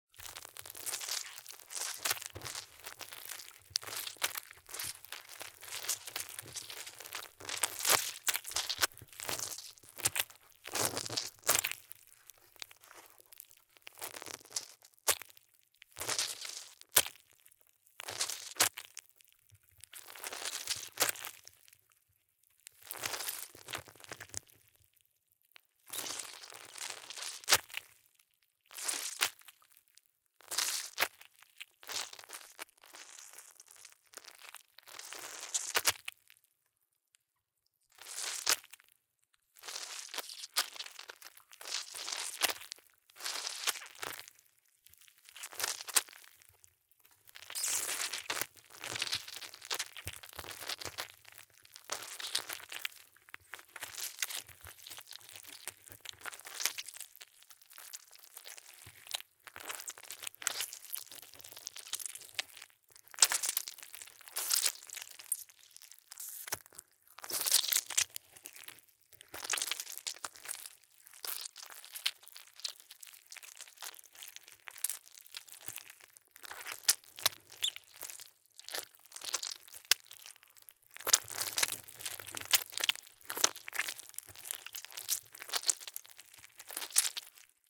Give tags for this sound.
dry; fruit; squish

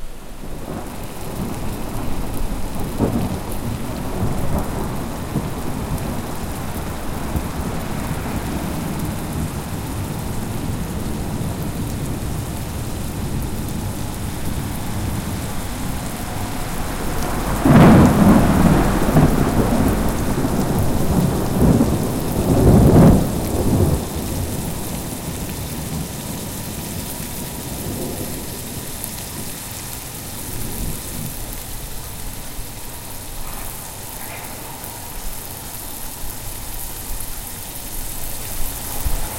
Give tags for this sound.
Nature; Night; Thunderstorm; Storm

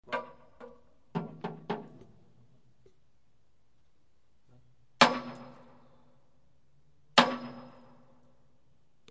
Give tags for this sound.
ambient; dark; deep; drone; effect; experimental; fx; germany; hangar; pad; recording; reverb; sampled; sound-design; soundscape; zoomq3

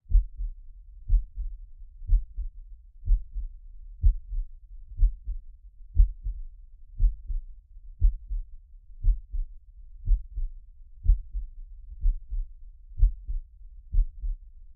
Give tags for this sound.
heart-beat; stethoscope; panic; human

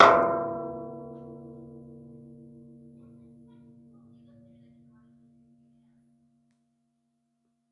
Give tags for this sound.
bong; contact; gong; impulse; metal; percussion; ping; playground; ringing; slide

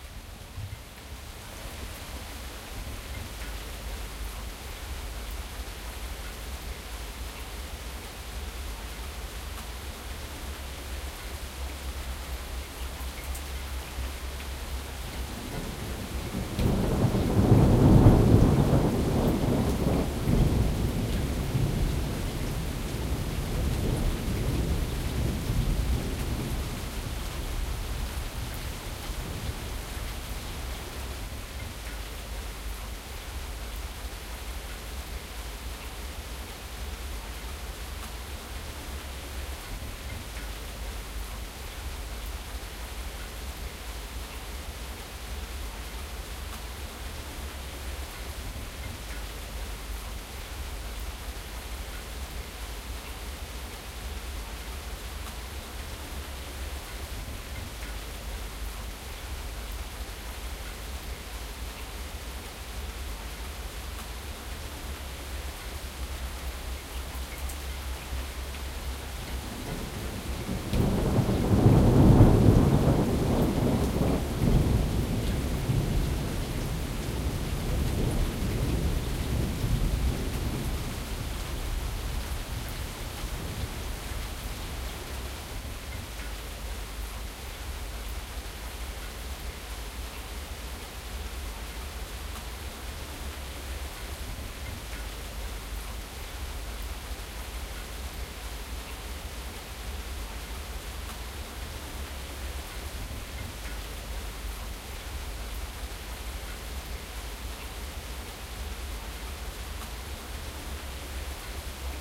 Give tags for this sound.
rumbling; weather; nature; rolling-thunder; field-recording; thunder; storm; ambience; thunderstorm; raining; ambient; suburb; lightning; wind; flash; thunder-storm; rain; water; atmosphere; deep; summer; ambiance; rumble; soundscape; general-noise; rolling

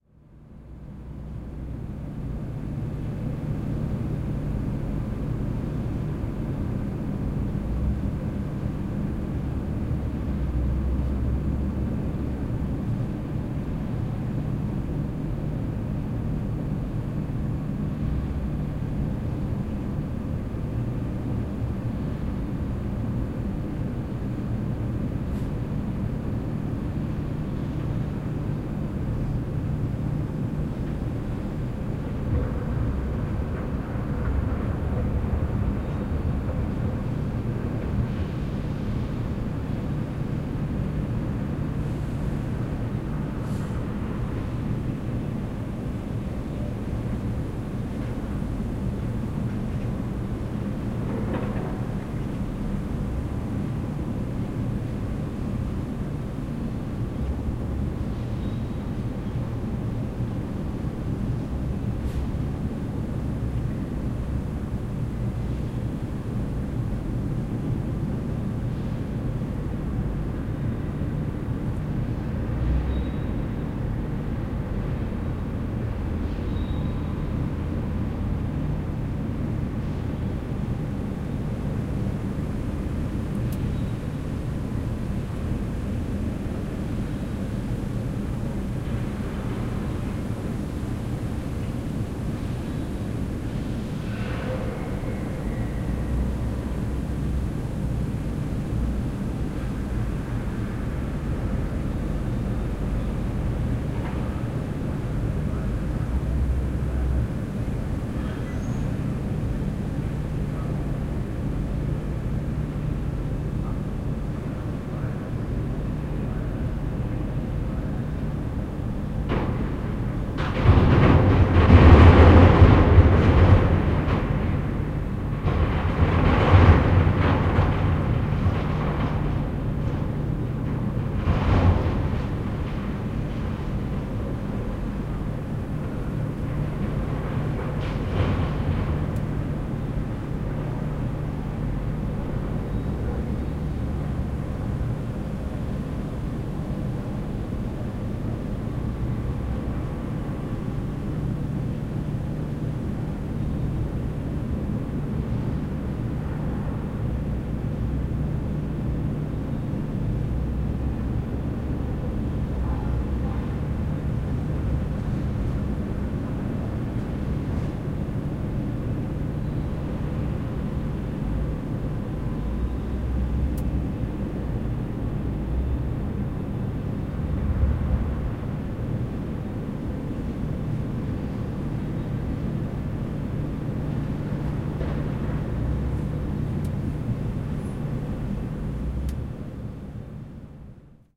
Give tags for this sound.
clatter; field-recording; hall; hamburg; hissing; machine; reverb; ruttle; swoosh

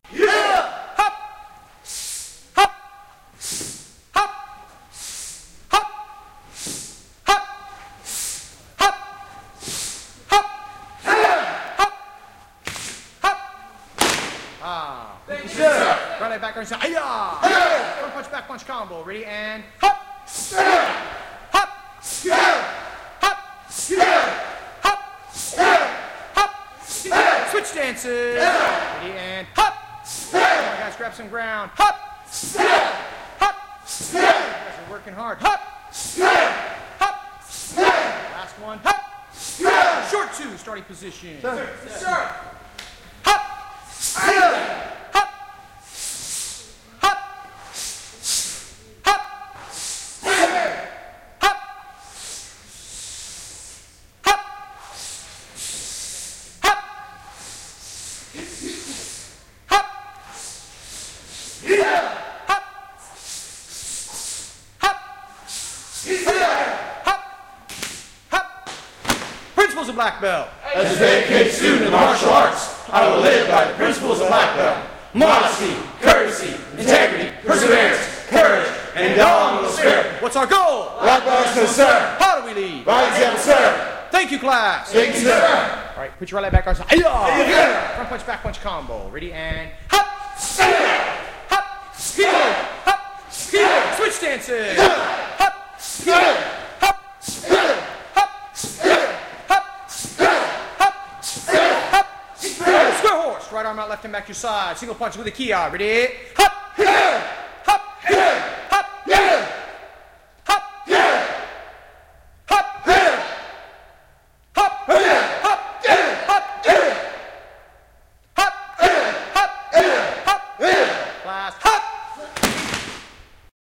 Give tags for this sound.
karate; practice; black; students; training; belt; class; instructor; stereo; fight

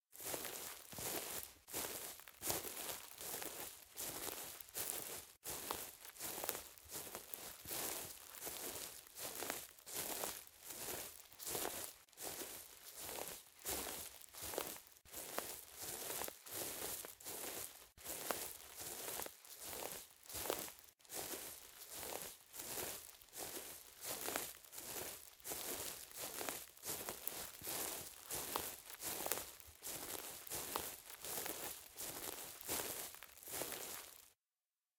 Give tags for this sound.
footstep; artificial; surface; walk; Foley; walking

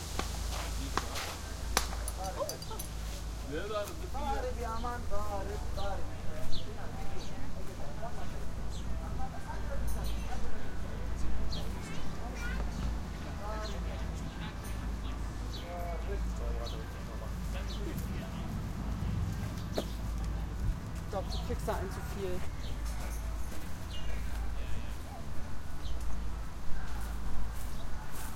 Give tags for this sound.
Birds; Humans; Outdoor; Car; Wind; People

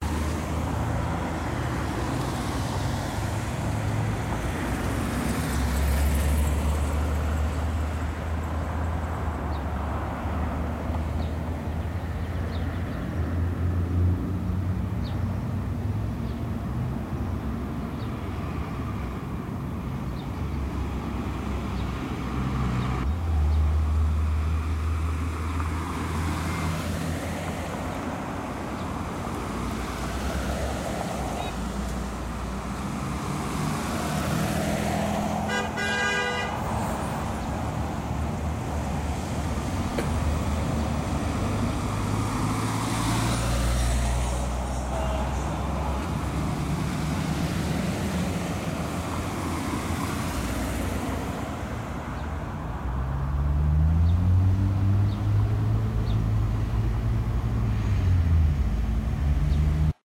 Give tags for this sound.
Traffic-ambiance; Field-recording; Medium-Traffic; Light-Traffic

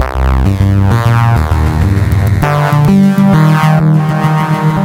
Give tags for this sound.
rhythmic; ConstructionKit; electro; dance; 120BPM; loop; electronic; bass

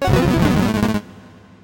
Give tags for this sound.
sound; effects; effect; game

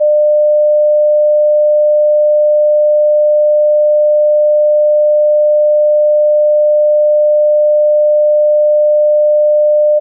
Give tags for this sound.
hearing-test; tone; sine-wave

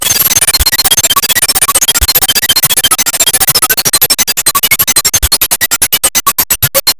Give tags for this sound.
comb; metal; grain

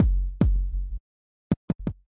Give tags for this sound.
procesed; experimental; glitch

